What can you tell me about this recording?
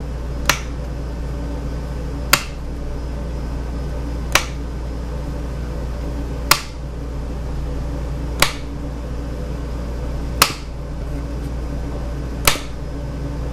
turning a light switch on and off
switch, off, light